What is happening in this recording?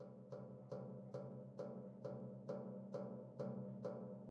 efan grill - soft tapping
An electric fan as a percussion instrument. Hitting and scraping the metal grills of an electric fan makes nice sounds.
sample, electric-fan, reverberation